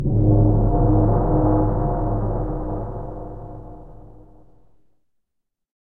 gong -10 sem
Based on my BOSS gong, lowered 10 semitones.